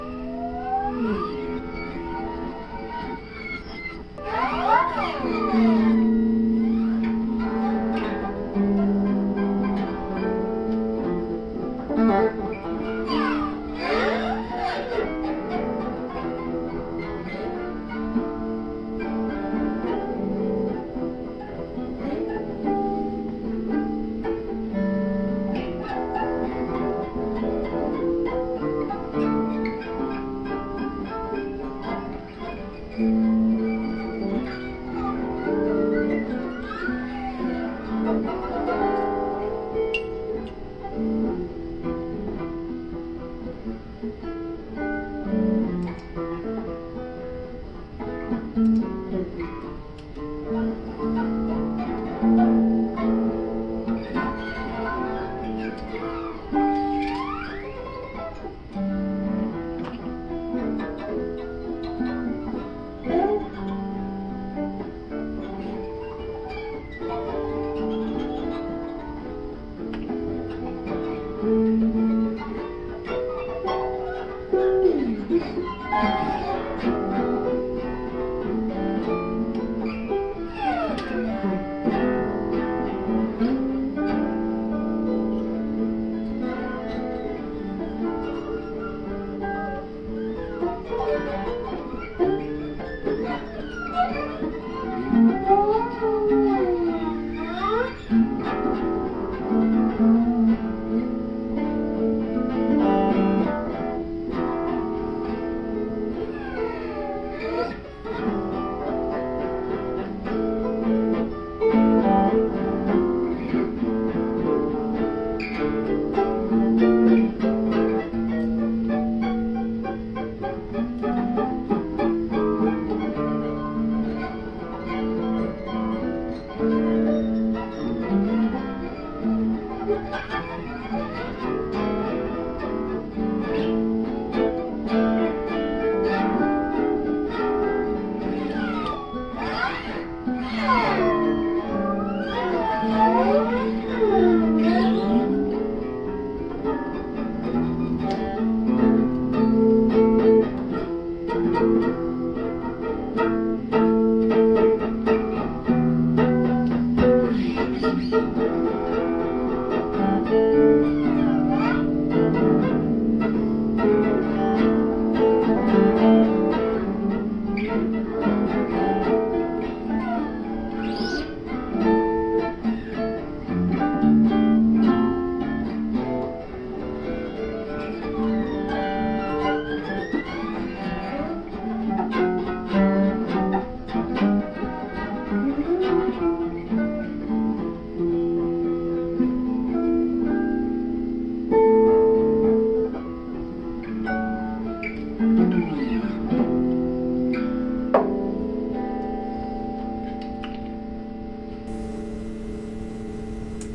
Another track with bss my creation an analog device
freestyle, electric, guitar